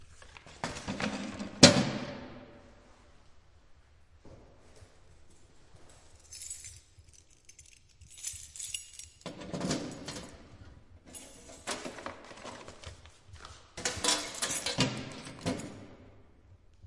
Letter Box
Throwing a letter into a metal mail box in a cold tiled hallway, opening the box with a key and taking it out, then closing the box.
Recorded with a Zoom H2. Edited with Audacity.
Plaintext:
HTML:
box, close, container, drop, echo, hallway, insert, keys, letter, letter-box, lock, mail, mail-box, metal, open, post, slide, unlock